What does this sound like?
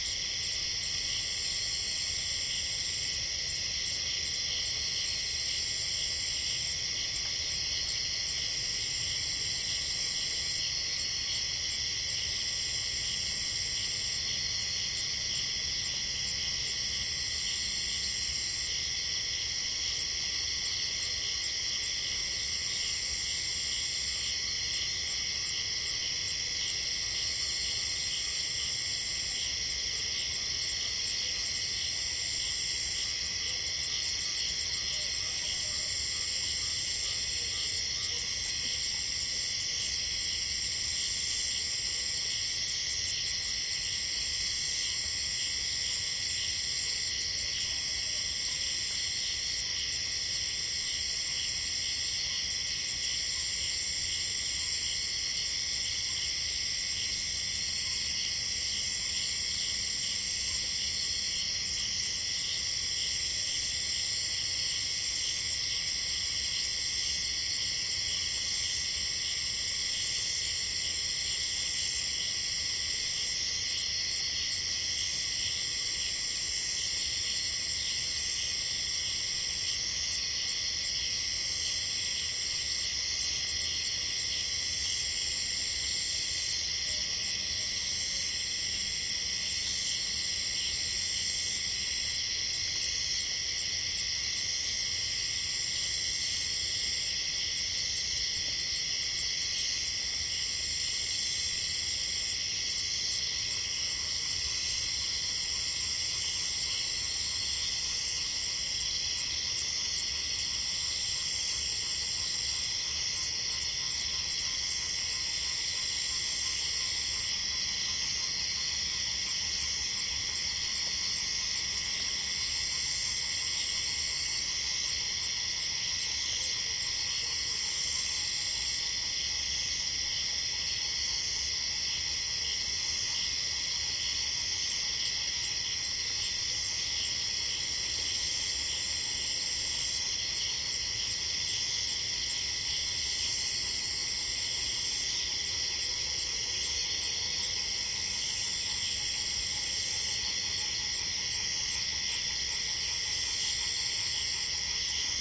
140930 night jungle nature ambience.Chiangmai Thailand. Cicades. Dogs. Roosters (ORTF.SD664+CS3e)
dog, cicadas, thailand, ambiance, field-recording, rooster, nature